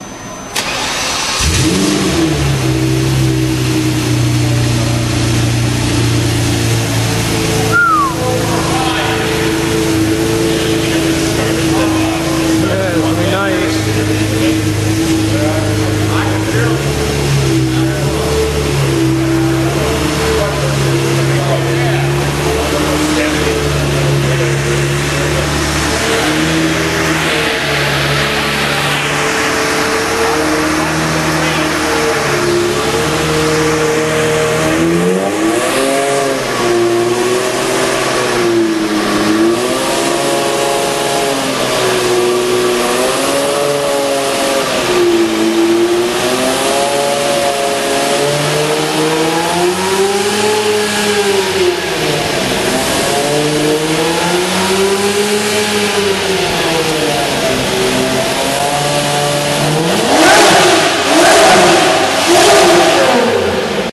Lambo idle and rev
This is from a video i took of when i was at the Lamborghini dealer.
idle, engine, lamborghini, car, superveloce